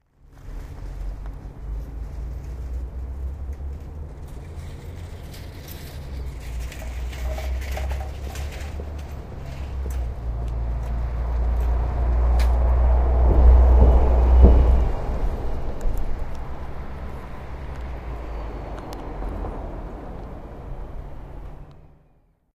Fiets & Bus
Standing on a bridge while a rattling noisy bike is passing followed by bus 21 bouncing on the edge of the bridge. Recorded with my new Edirol R-09 in the inside pocket of my jacket.